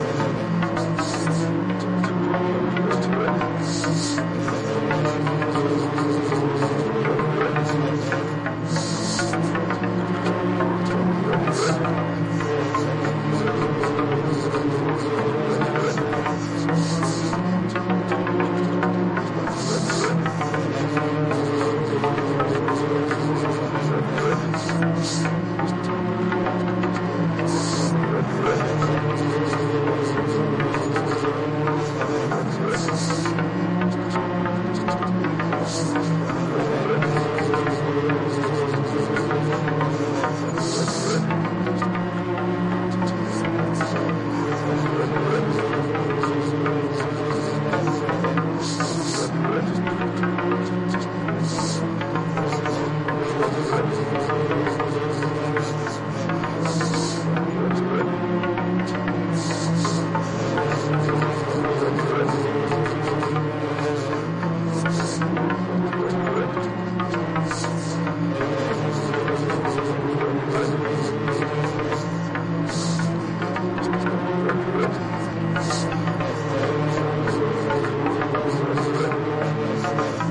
Vocals through Arbhar processed through microcosm and Erbe-Verb. Plucks from Rings and Plaits. Panning via X-Pan and Stereo Strip (Divkid). Enjoy!